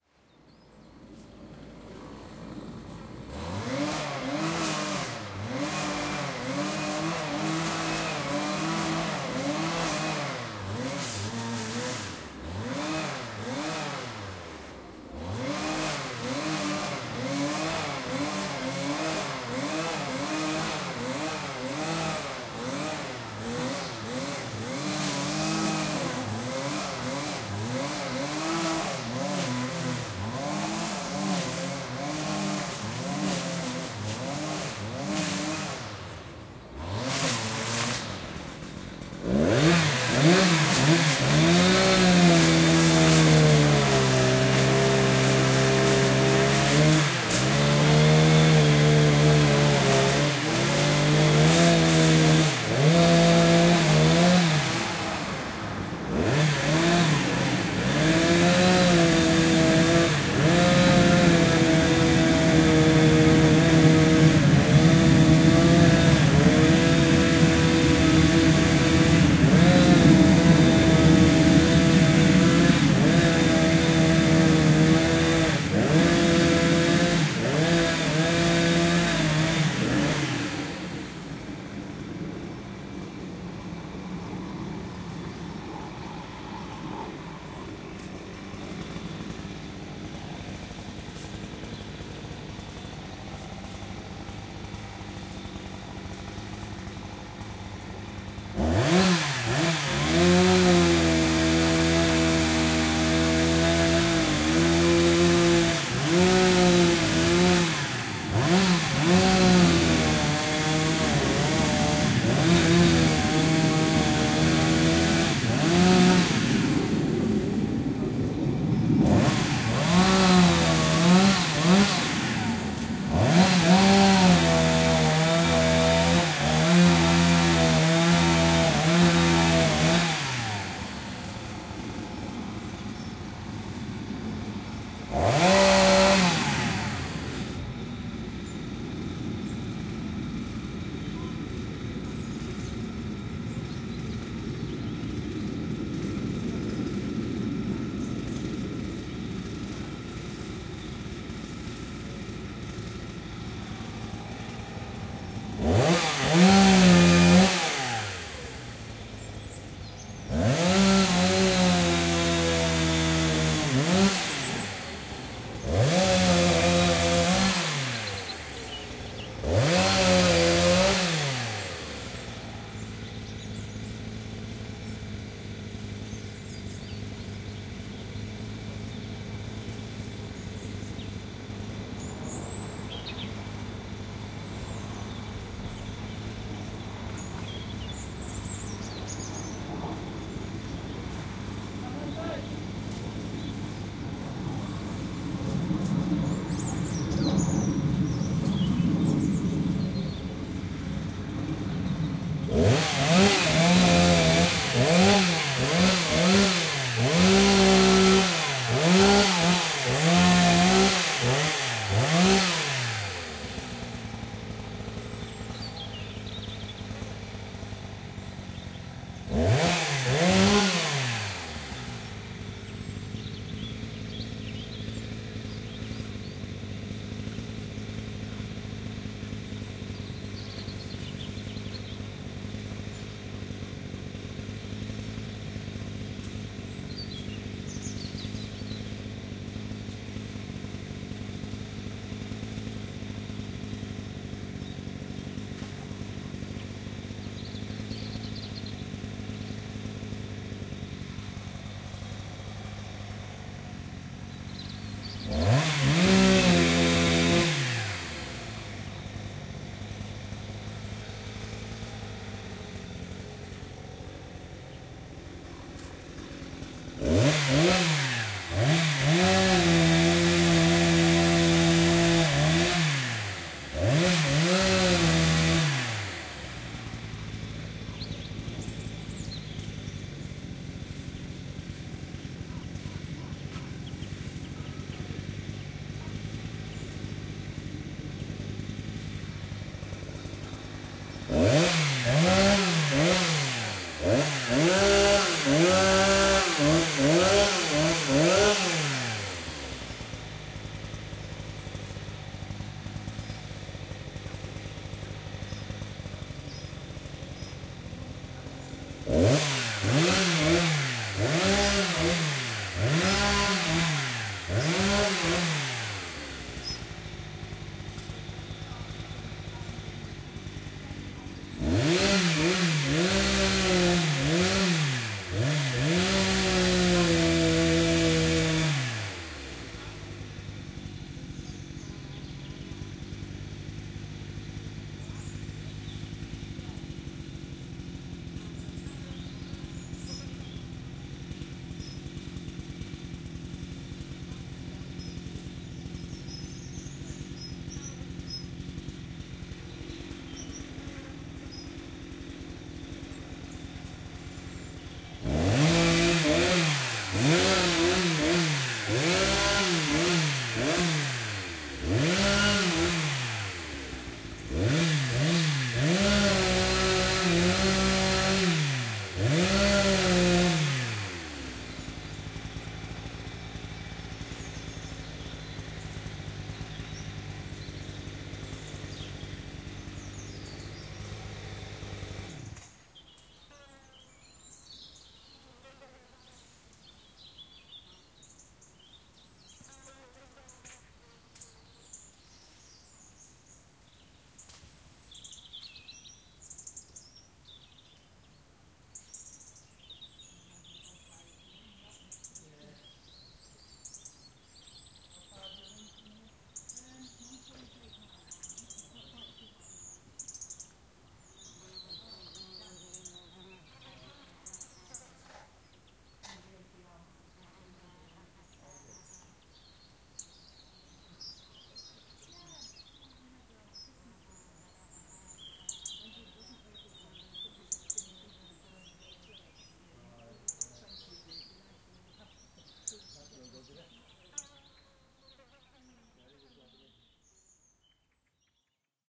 Strimmers And Chainsaw 2
A stereo field-recording of some strimmers and a chainsaw clearing a plot of land of brushwood and small trees. Rode NT-4 > FEL battery pre-amp > Zoom H2 line in.
chainsaw, field-recording, machinery, stereo, strimmer, trees, wood, xy